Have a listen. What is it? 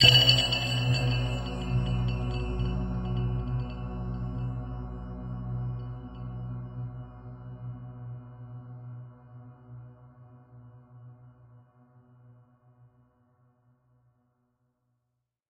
Big Bell 3
Tweaked percussion and cymbal sounds combined with synths and effects.
Bells, Oneshot, Percussion, Sound-Effect